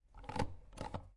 Sound of pressing and relasing plastic self-inking stamp recorded using stereo mid-side technique on Zoom H4n and external DPA 4006 microphone
aproved, bank, cancelled, certified, click, completed, down, letter, office, paper, post, press, relase, self-inking, stamp, stamping, stationary, top-secret